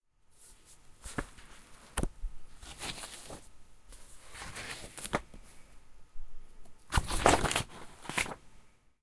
Paper Sketchbook Page Flips 1
Flipping through the pages of a heavy stock paper sketchbook.